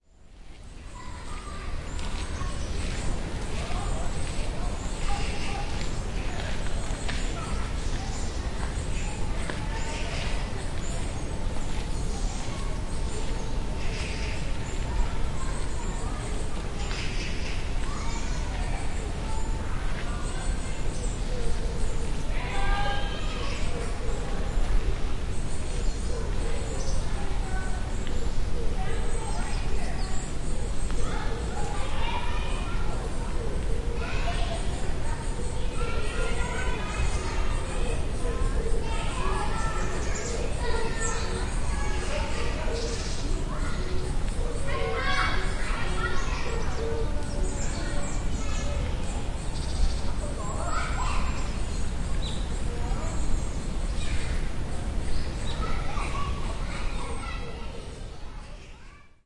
0378 Kids ambience
Kids in the background. Forest ambience at Changdeokgung Palace, Secret Garden.
20120721
ambience, field-recording, korea, korean, seoul, voice